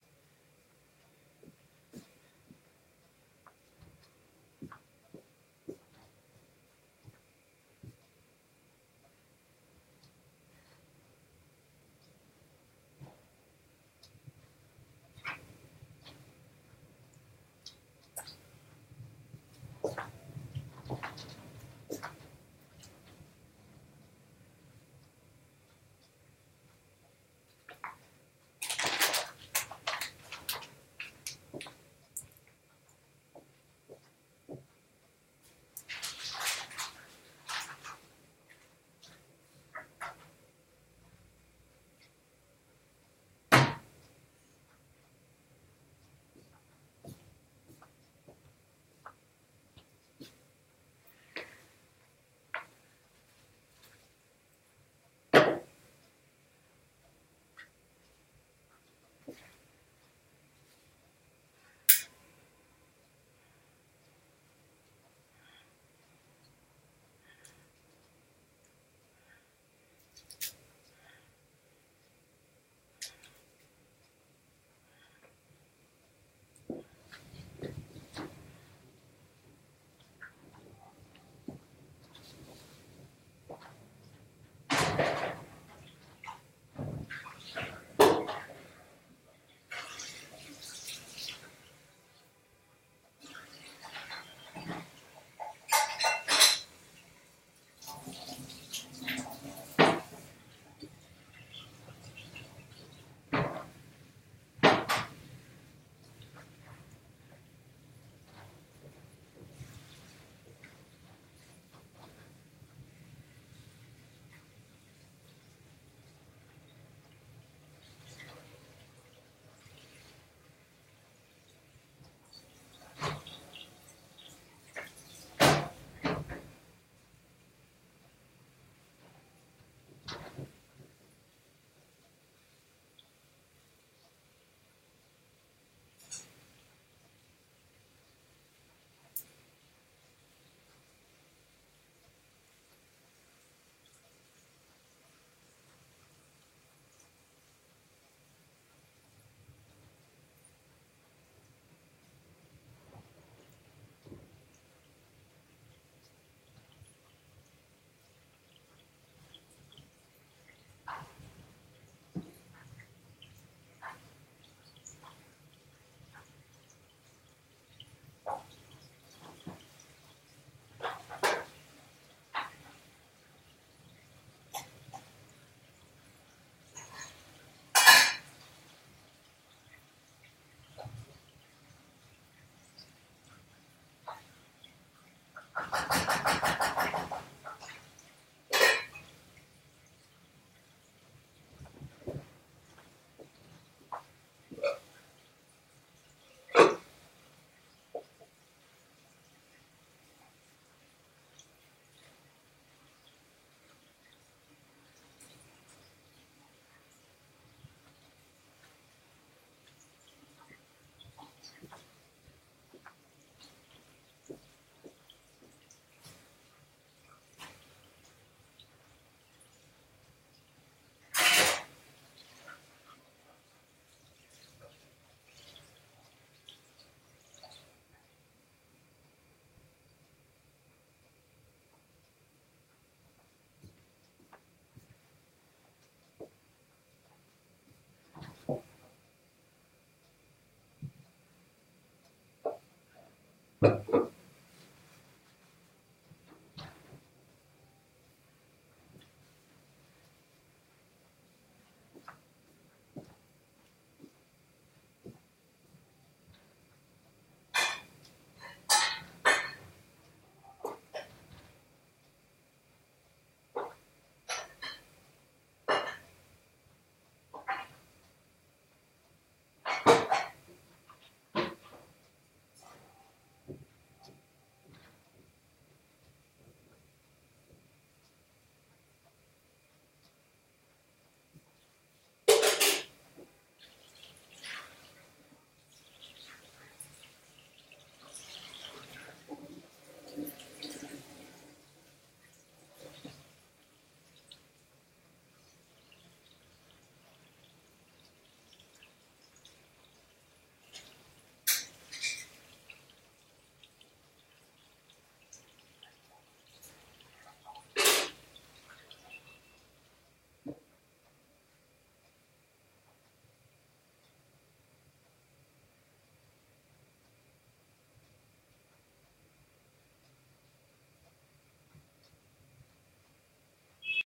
grandma cooking
grandma from South of Italy cooking in the kitchen background
grandma, background